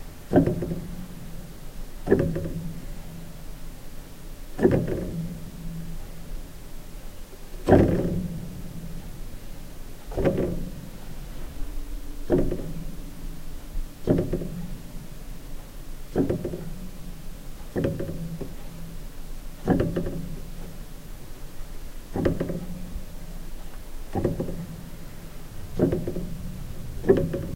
I noticed there were no piano hammers/action sounds on here so I recorded my Baldwin Upright Piano Hammers
action, hammer, old, piano